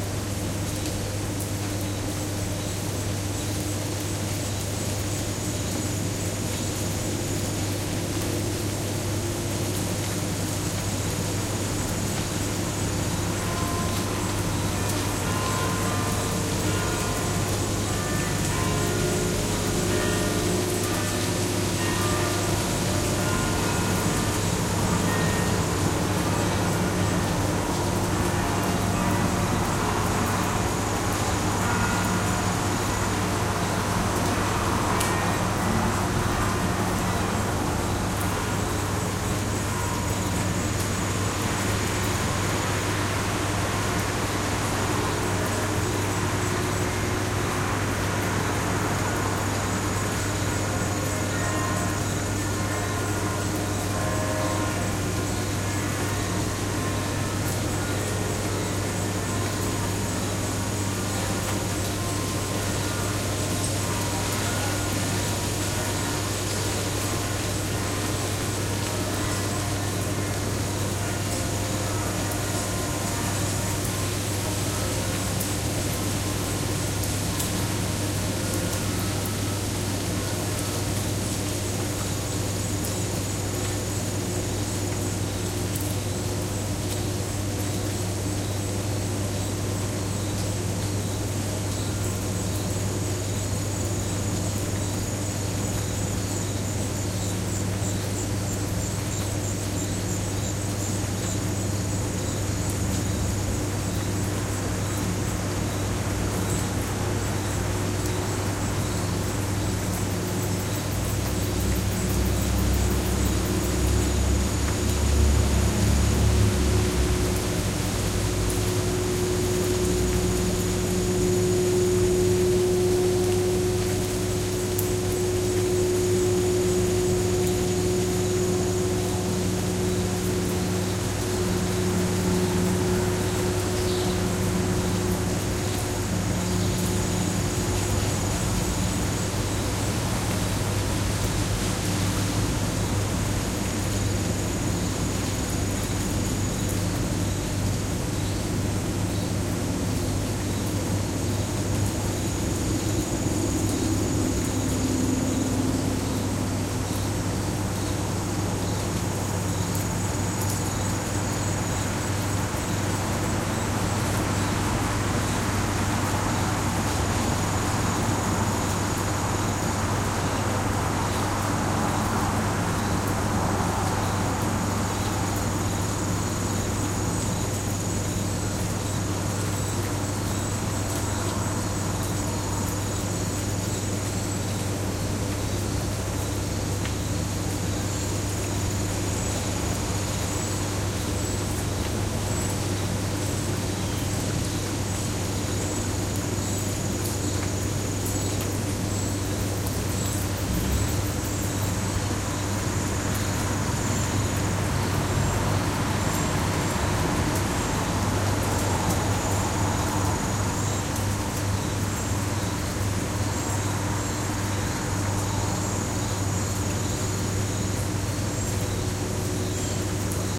Recorded outside my window with my new Zoom IQ7 with IPhone SE2020. Bells of the Benedictine Abbey a few blocks away ring, then bells from another church join in. A few seconds after they stop you can hear some sort of mobile machinery approaching in the distance, and cars going by in the distance.
Atchison, bells, bird, blue-jay, church-bells, field-recording, small-town